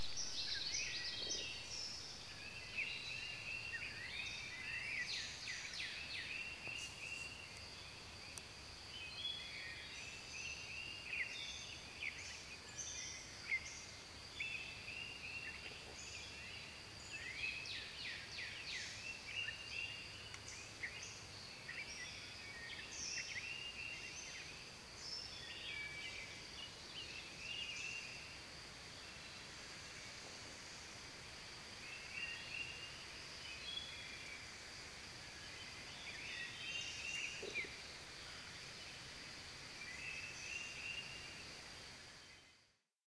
Recording of birds in a forest in Delaware state, USA. Recorded on a Droid Eris phone in June 2010.
usa, birds, forest, delaware